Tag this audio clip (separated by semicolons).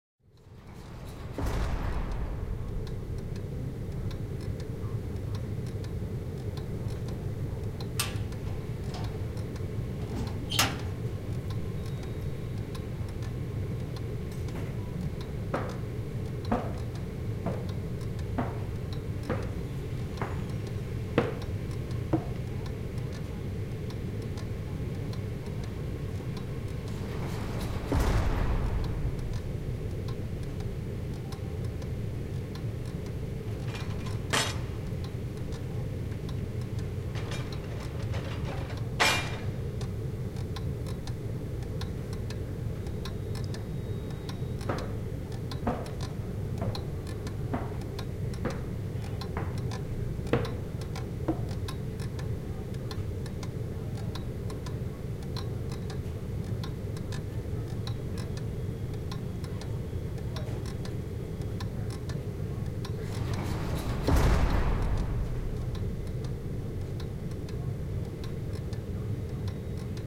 hall; hum; ambience; jail; prison